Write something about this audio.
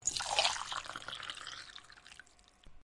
Pouring the water/coffee
Pouring water/coffee into a cup. Recorded with a Blue Yeti.
water, pouring, pour